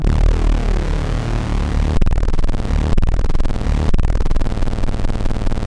A short but loud burst of synthesised noise and static.
electro, synth